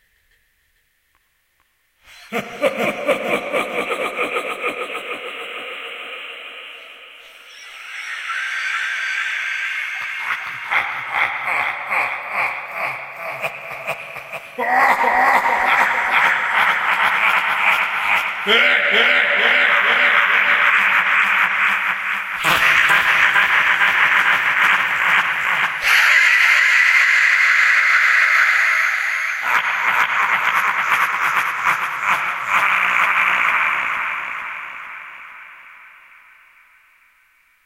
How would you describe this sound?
monster insane laugh
This sample has been made using simple Re-verb, Chorus and Delay effects intended to be a monster-like insane laughter. Hope it'll be useful. Enjoy!
alien, death, dracula, horror, insane, laugh, laughter, monster